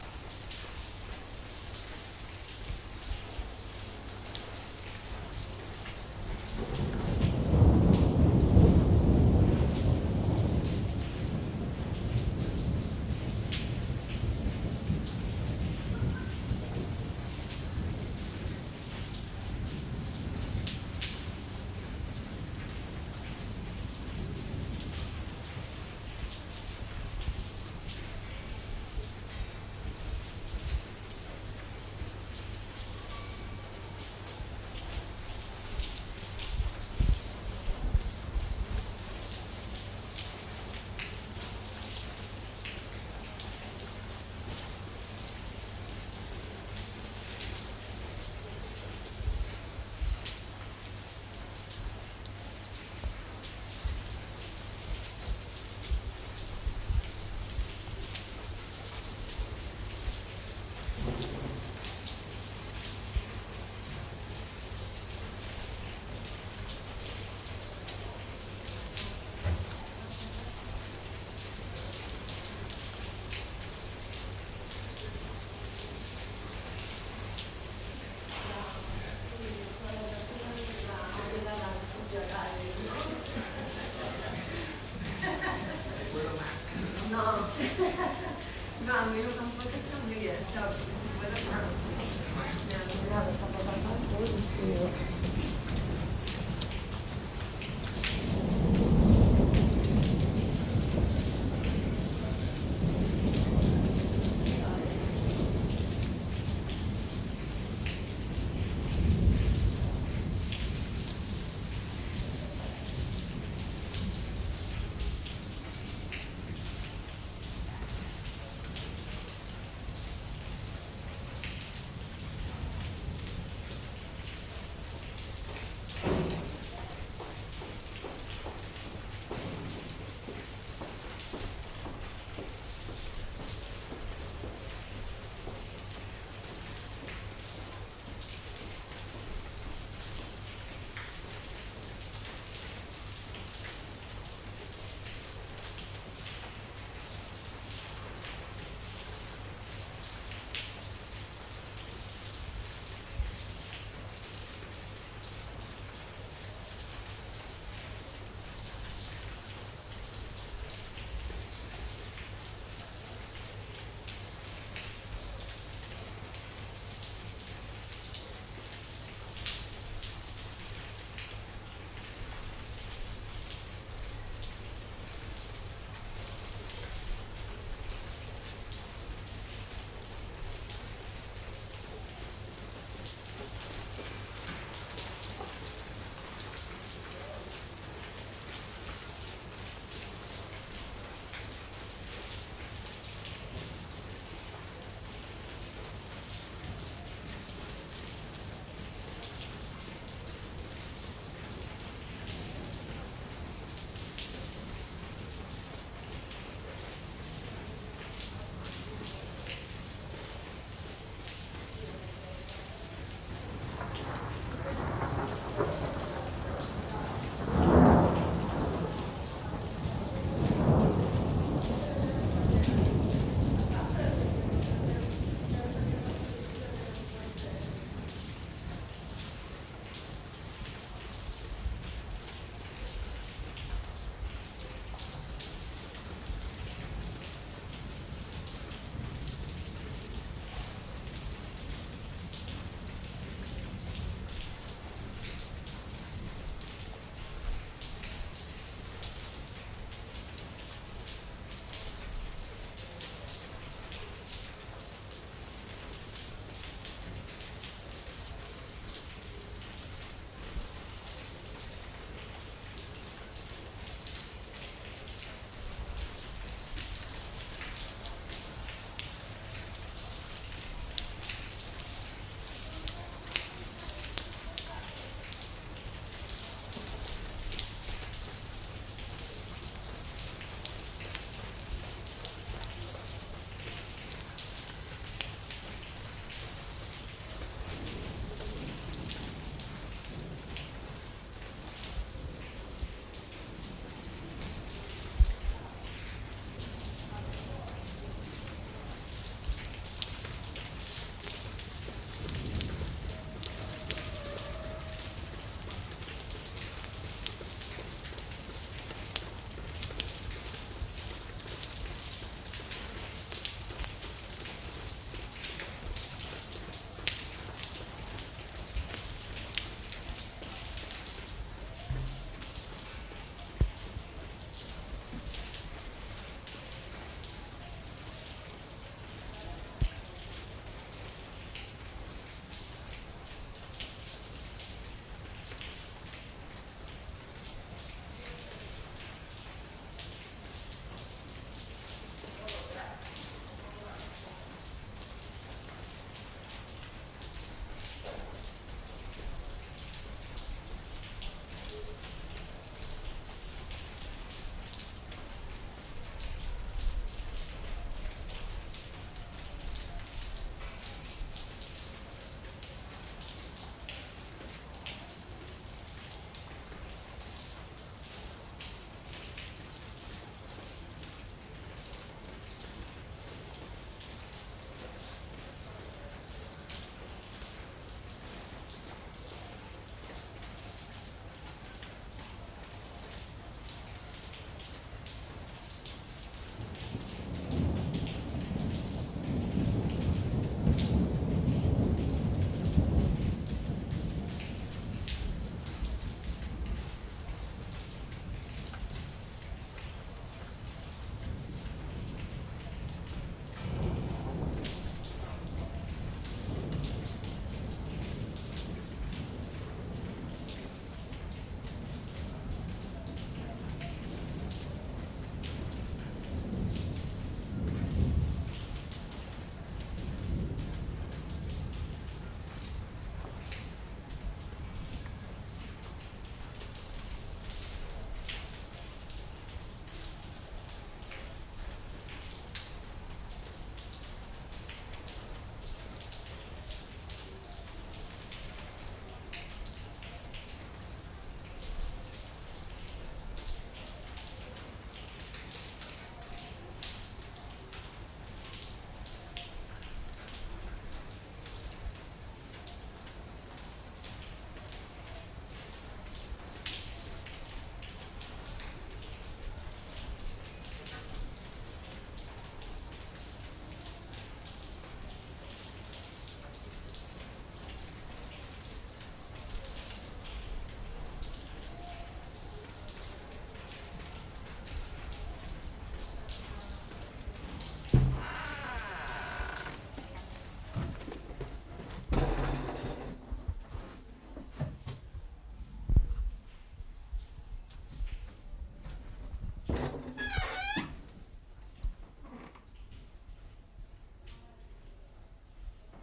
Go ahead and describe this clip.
The sound file was recorded during a storm in Barcelona with a portable digital audio recorder and shotgun microphone.
The directionality of the sound was changed according to onsets of interest.
storm, rain, barcelona